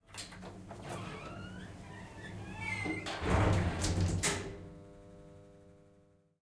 elevator door close 6a
The sound of a typical elevator door closing. Recorded at the Queensland Conservatorium with the Zoom H6 XY module.
close, closing, door, elevator, lift, mechanical, open, opening, sliding